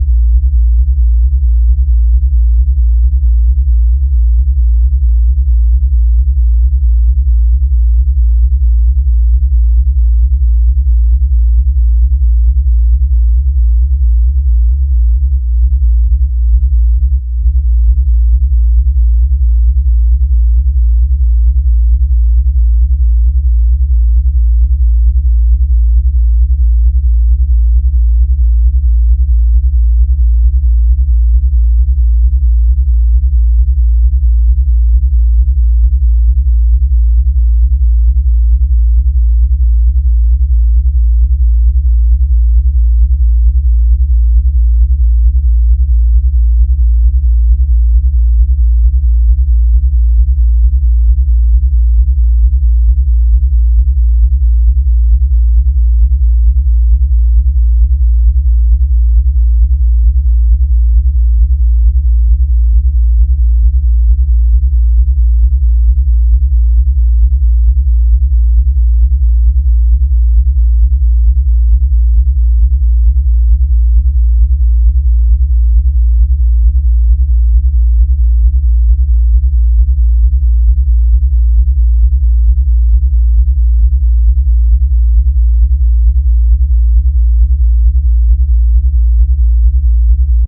system 100 drones 2
A series of drone sounds created using a Roland System 100 modular synth. Lots of deep roaring bass.
bass-drone, modular-synth